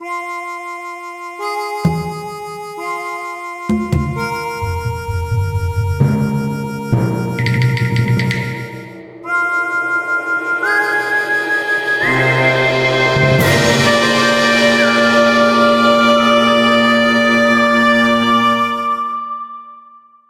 Wild West #2
Wild West sound made in FL Studio.
2021.
calm, clean, cowboy, desert, drums, electric, harmonica, old-west, percussion, sample, snare, spaghetti, splash, trumpet, violin, west, western, whistle, wild-west